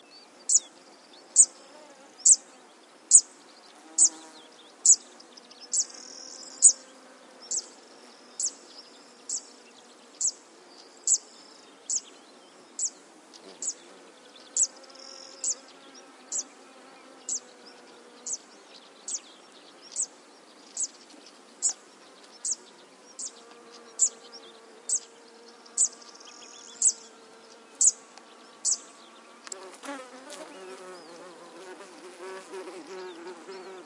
call of a little bird unknown to me. Other birds in background. Rode NT4 > iRiver H120 /canto de un pajarillo que no conozco